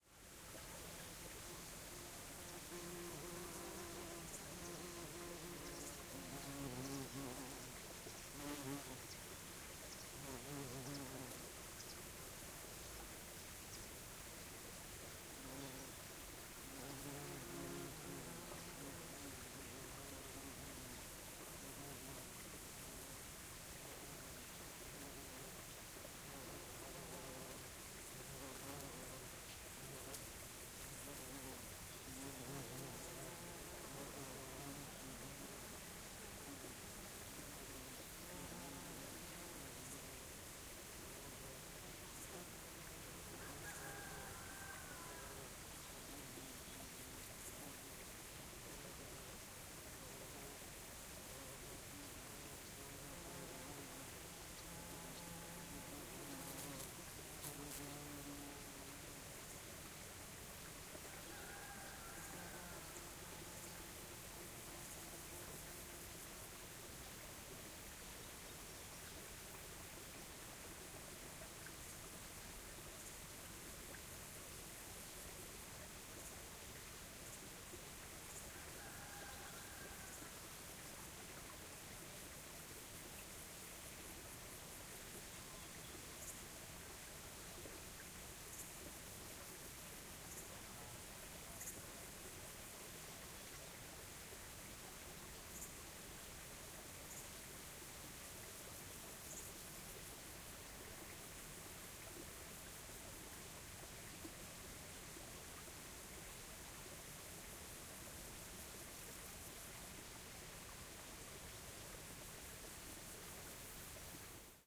AMB Summer field countryside cicadas close bees stream
Field recording in rural Serbia. Mid afternoon in August.
Facing a small stream, bees buzzing about and some birds.
Recorded in 2010.
Serbia, recording, hay, ccO, field, bees, water, insects, stream, ambient, calm, summer, nature, country, rooster, bucolic